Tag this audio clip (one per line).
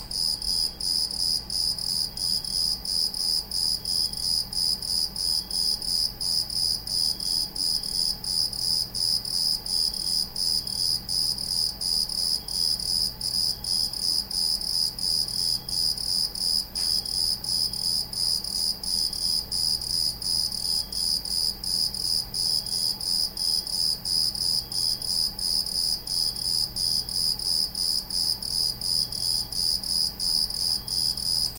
cricket
grillo